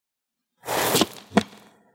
Sliding to Base
Sliding a foot across the dirt and then stepping.